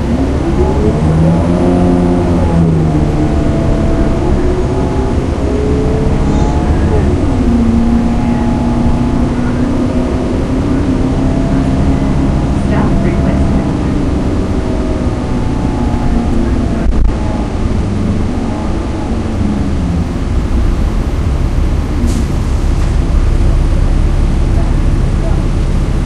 Recorded during a 12 hour work day. Noise filter engaged, we have... sounds the same to me.
transportation, public